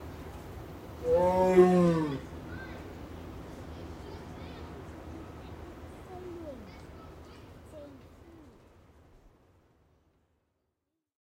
A tiger roars once.
(Recorded at the Louisville Zoo, in Louisville, KY)
animal, animals, field-recording, growl, growling, lion, roar, roaring, tiger, zoo